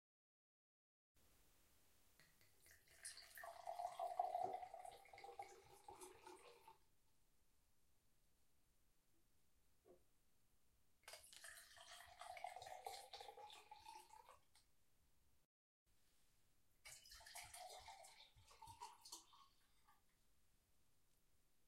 Pouring glasses of wine slight distance
Pouring a few glasses of wine at a slight distance of about a metre.
glass, wine, pour